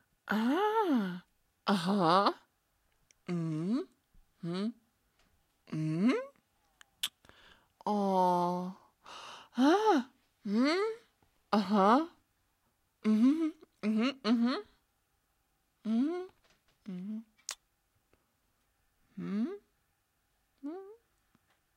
voice of user AS092866